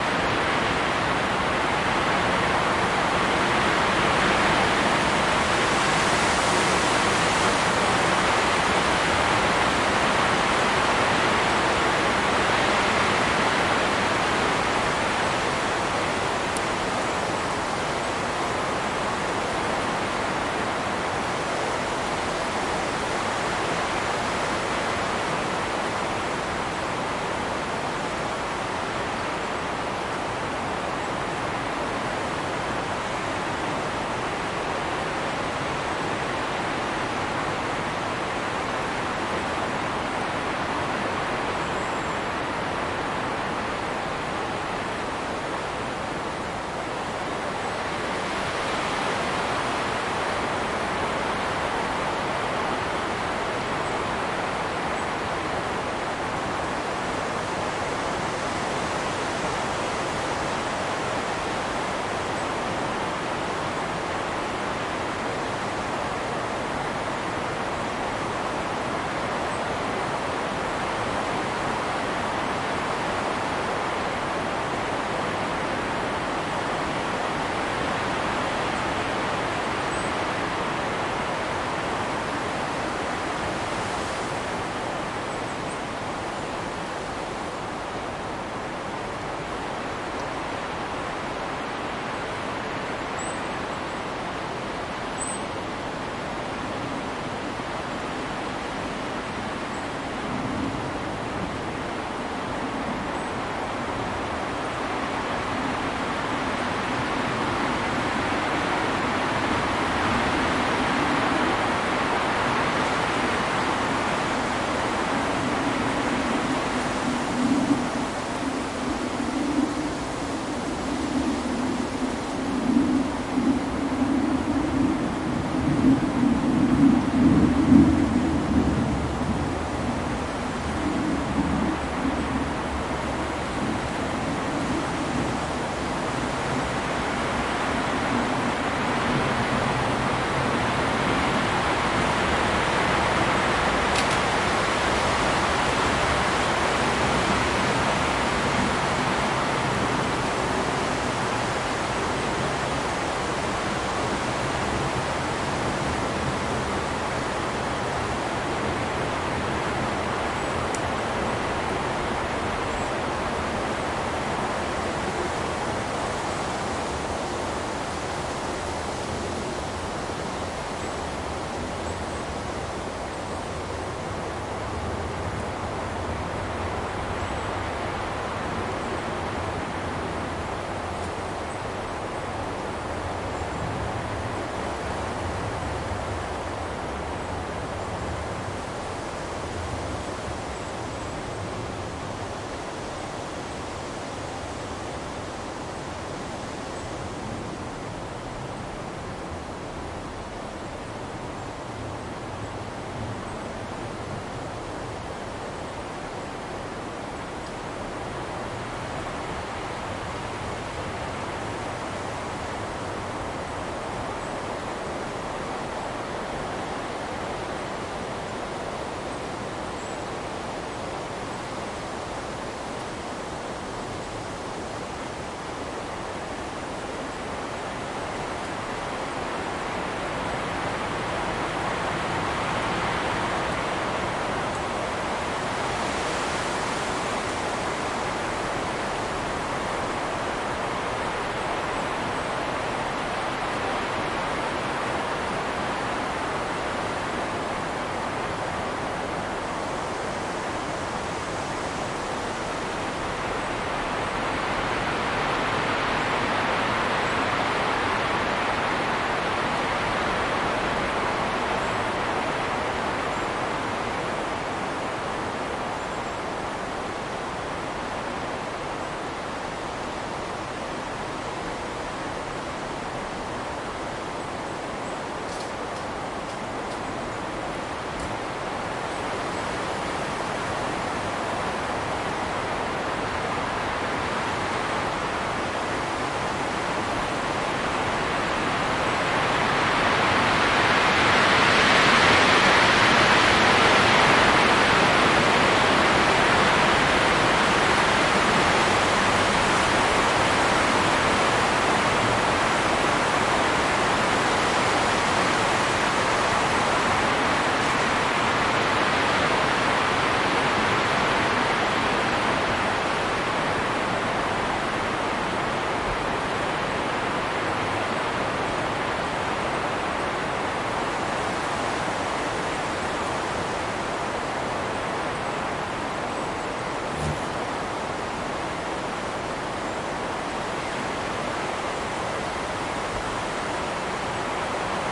Wind in Trees
4 microphone surround recording at Sliteres Nature reserve in Latvia. Sound of wind in tall trees, low airplane-above-your-head noise, rustling of leaves and a very close bumblebee fly-by around 05:26.